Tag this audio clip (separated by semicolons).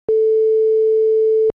wave; tracker; Sine